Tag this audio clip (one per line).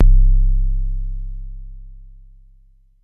mam
adx-1
analog
singleshot
drumbrain